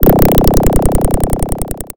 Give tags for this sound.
8-bit; glitch; glitch-effect; kicks; rhythmic-effect

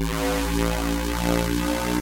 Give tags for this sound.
synth; synthesis; synthesizer; synthetic; wave

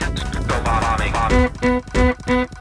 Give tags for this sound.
sliced; experimental; extreme; rythms; glitch; electronica; hardcore; processed; idm; electro; drums; drumloops; breakbeat; acid

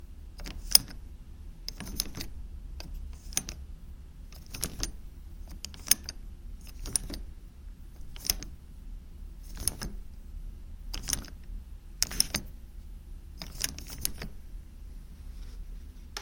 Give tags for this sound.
click; metal; door; lock; unlock; door-handle; handle

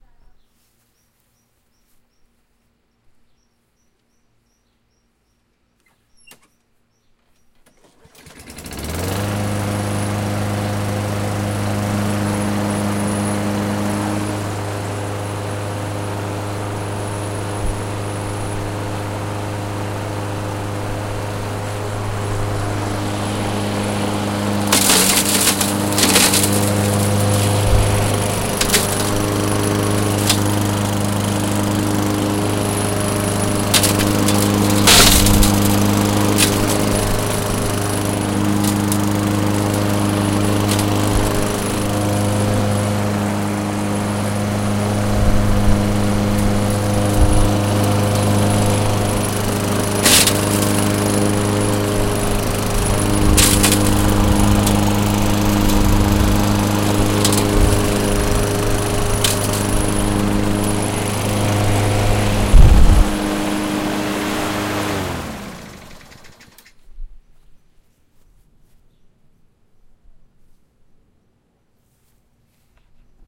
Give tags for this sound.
engine; mechanical; motor

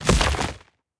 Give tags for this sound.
impact; element; magic; skill; rpg; spell; game-sound; magical; wizard; earth; stone; debris; punch; magician; rock

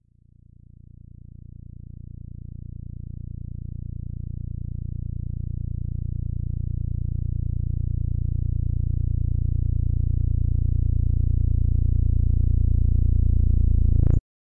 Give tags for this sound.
Techno; Bass; Line

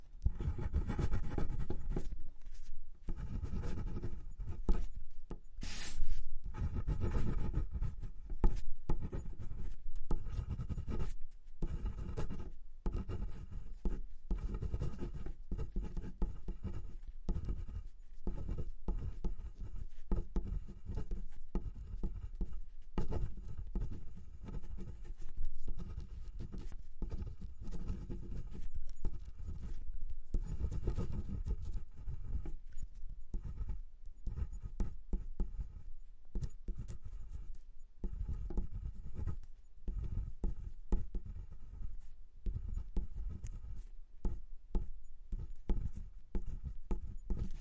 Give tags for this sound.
writing; bic; paper; pen; drawing

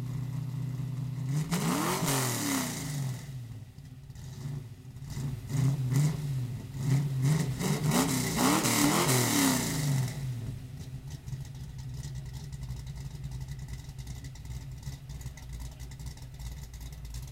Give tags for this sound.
engine; v8; motor

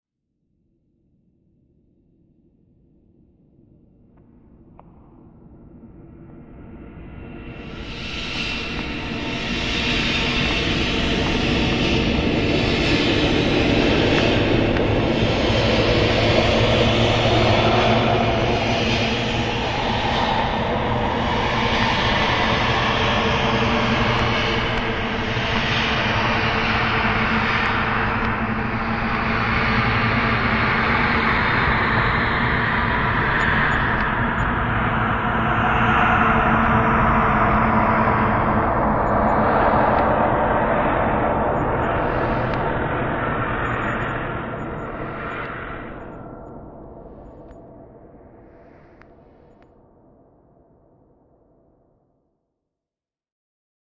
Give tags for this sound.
Ambient; Atmosphere; Cinematic; Dark; drone; Film; Free; game; horror; scary

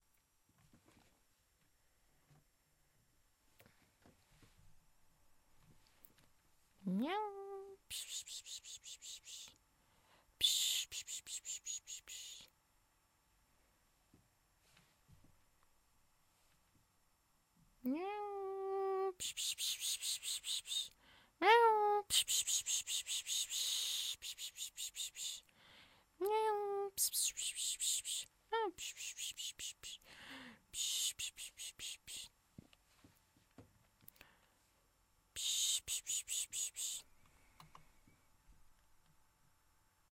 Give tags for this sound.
call; Cat; voice

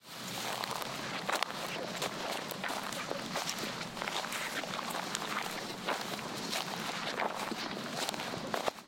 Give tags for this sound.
grass; step; wet

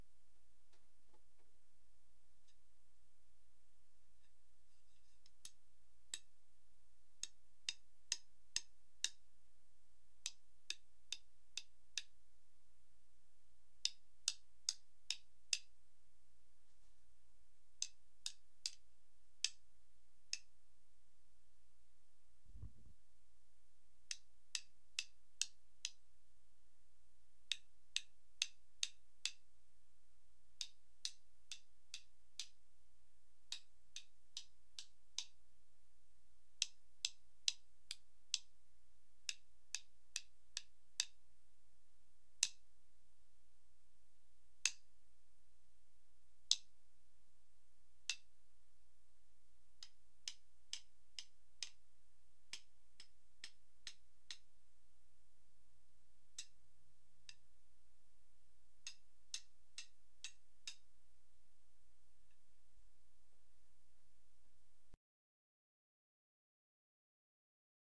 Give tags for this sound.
domain; drum; drum-sticks; public; stick; sticks; unprocessed

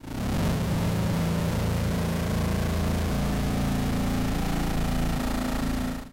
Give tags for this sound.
game; 8bit; computer; loop; tune; heavy; sample; effect

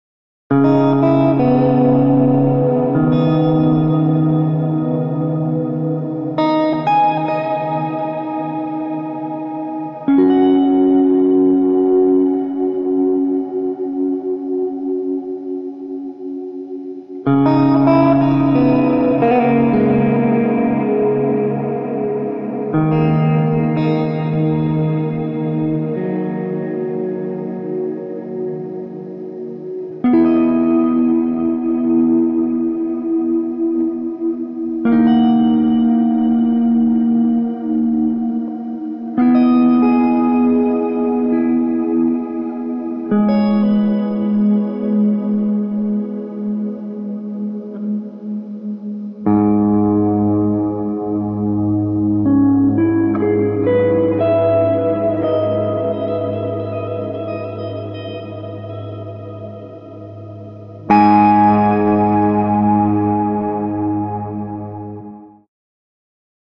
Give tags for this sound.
acoustic; atmosphere; background; calm; chill; cinematic; classical; clean; dark; deep; dramatic; electric; film; guitar; melancholy; mellow; melody; minimal; mood; movie; music; relaxing; reverb; sad; slow; soft; solo; soundscape; soundtrack; texture

